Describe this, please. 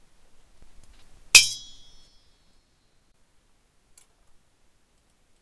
Swords Clash 2
Swords clashing (Use for what ever you would like).
Swing, Knight, Medieval, Clash, Ting, Weapon